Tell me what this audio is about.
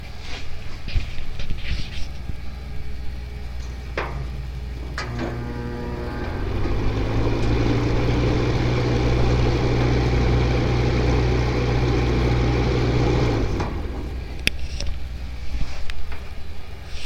heater start
An electrical resistance heater (space heater) while starting up. The heater is believed to an Arvin Heatsream 1000.
Recorded directly into an AC'97 Soundcard by a generic microphone.
household, unprocessed